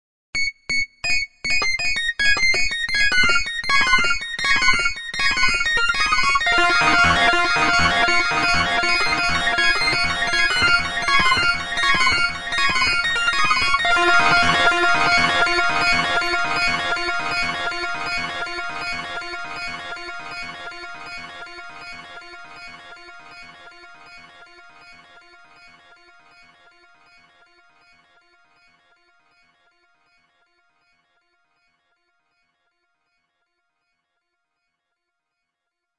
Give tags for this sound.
Loud; Synth

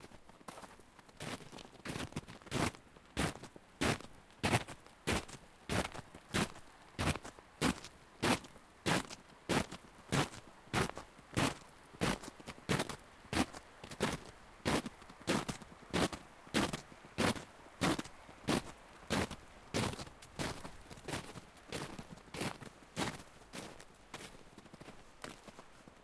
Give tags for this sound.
footsteps; walking

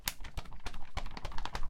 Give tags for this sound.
bird; flap; wing

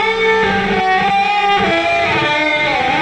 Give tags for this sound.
solo; guitar; electric

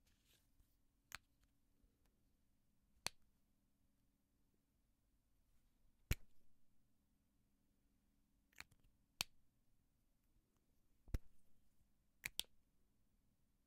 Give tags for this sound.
clicking; click; clicks; marker

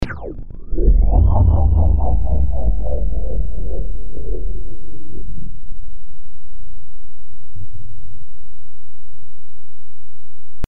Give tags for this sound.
fictitious; fx; sound

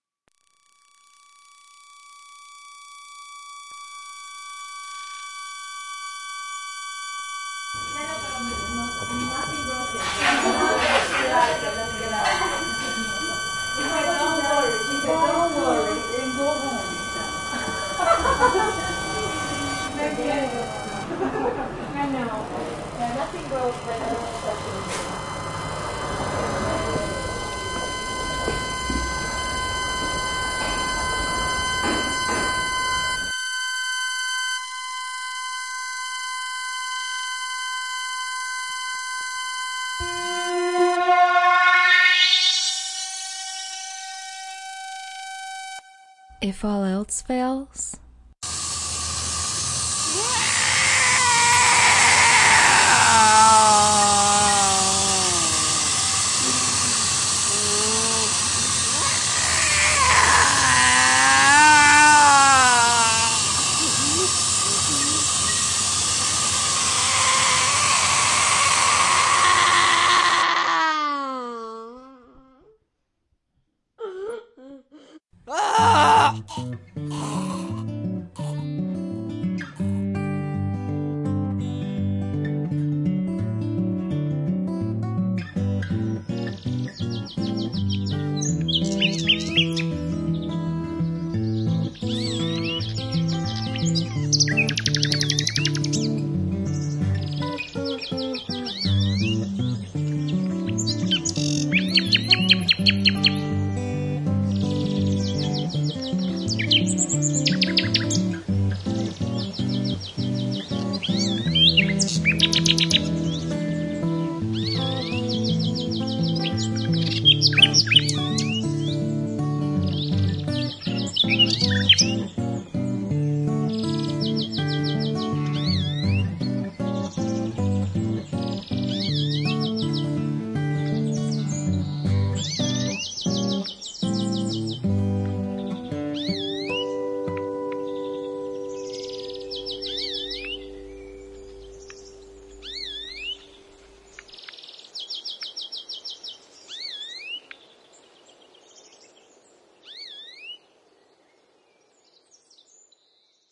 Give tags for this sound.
cain; death; fratricide; hatred; killing; mix; murder; pain; peace; voice

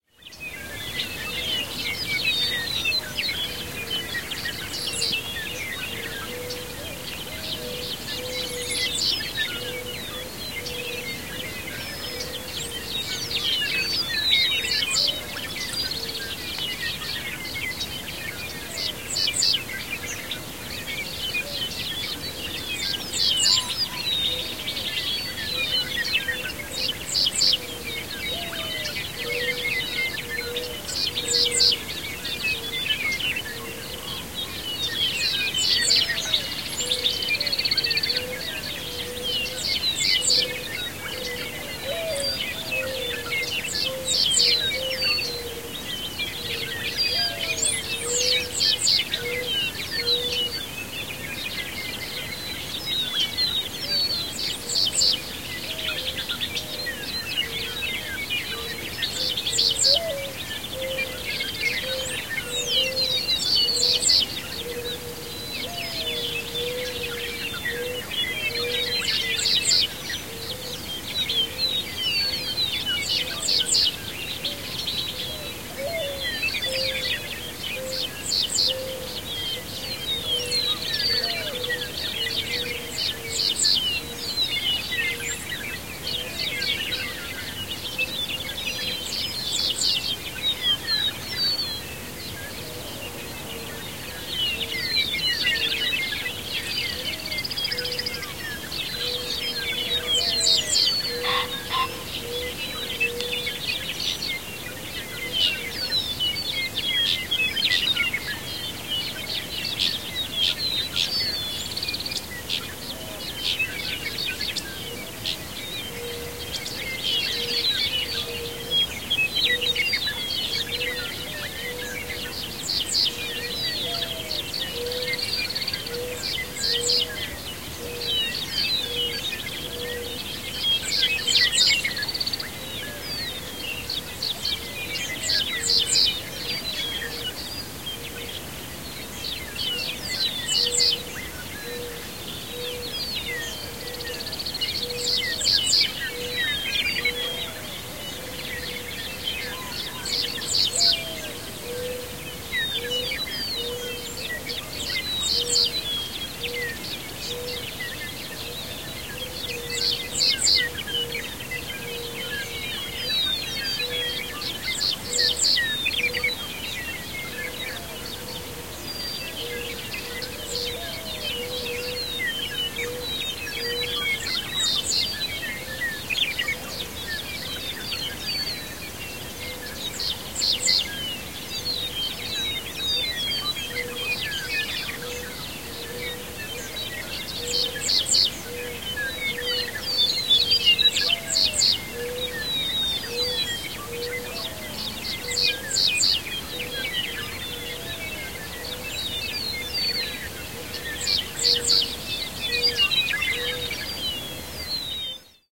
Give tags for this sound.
ambience; birds; country; doves; meadowlarks; morning; nature; peaceful; prairie; rural

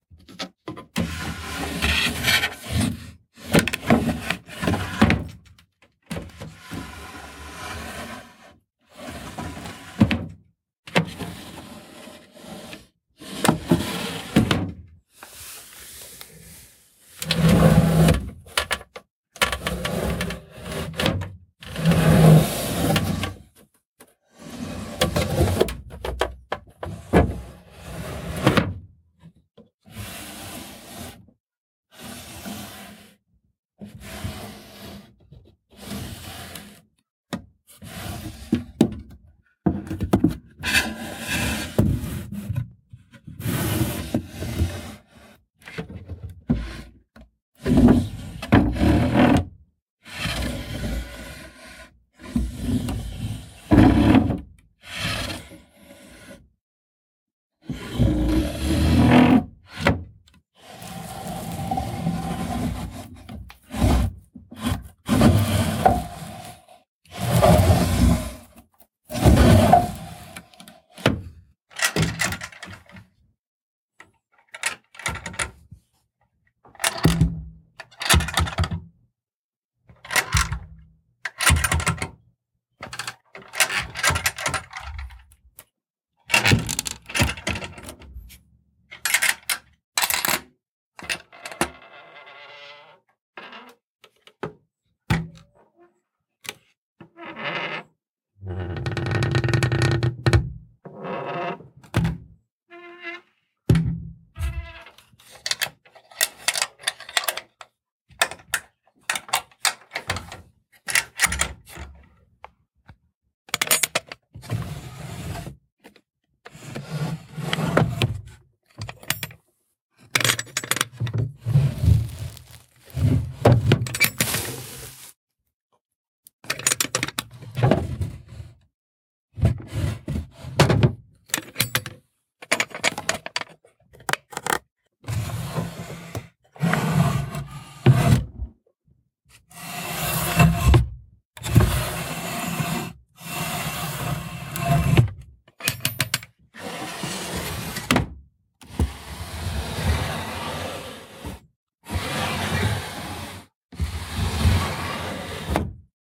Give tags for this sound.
furniture; old; rumble; close; zoom-h4; groan; wooden; slide; open; drag; field-recording; drawer; creak

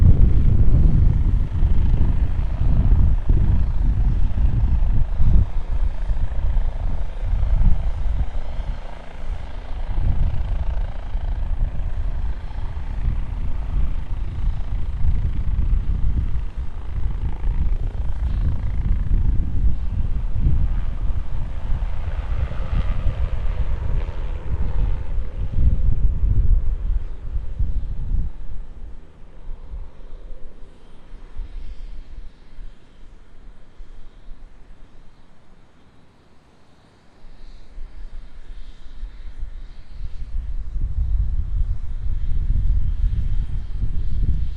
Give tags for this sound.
engine; aviation; transportation

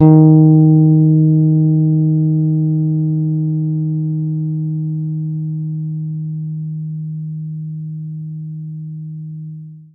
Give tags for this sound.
guitar; tone; electric

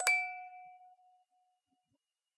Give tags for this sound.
toy; metal; sample; note; clean; musicbox

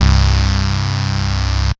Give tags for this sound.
bass; saw

